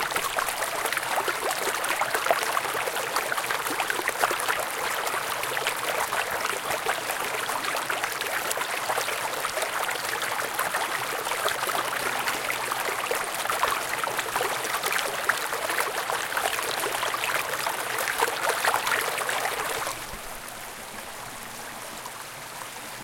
Field recording of a river in Norway. Taken with a zoom h4 recorder.